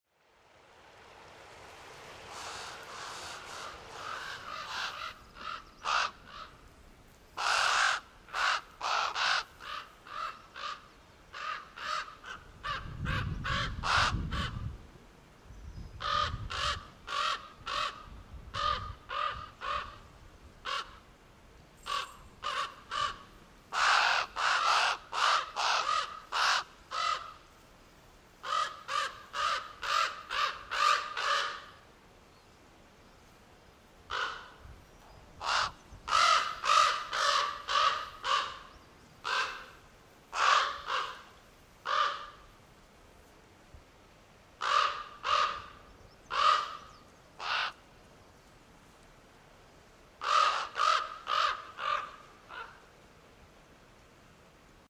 Zoom H6 shotgun recording on ravens squawking by a creek in rural Manitoba.